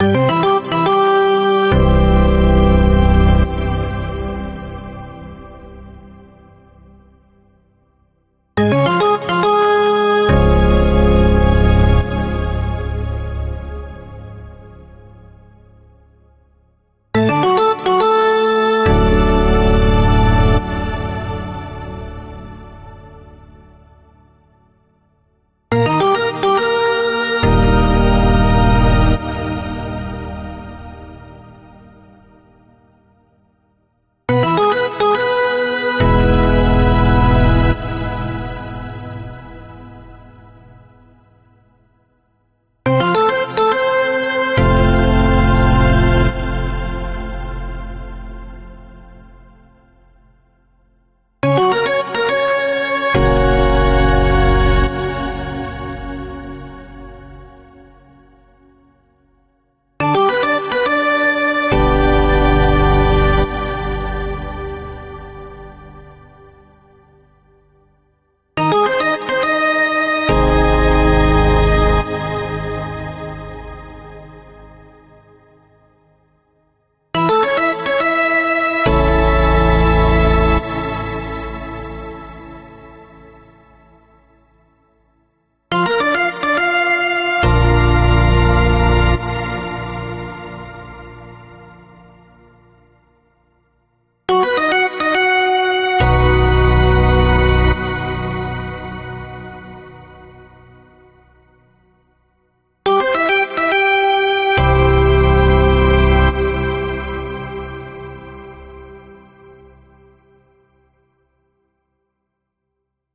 Hockey fanfare 2e
A crowd energizer that is played in down times during hockey, baseball and other sports. Recorded over the semitones in 1 octave. This one is played by a third drawbar organ.
baseball, engage, hockey, socker, sports, teaser